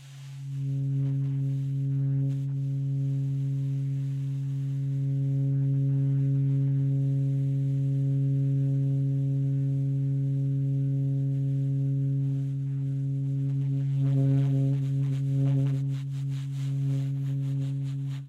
A long subtone concert D flat on the alto sax.
flat, smith, d, howie, sax, subtone